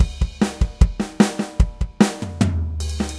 Sommerfrost Drums HQ 150 BPM 3
snare, rock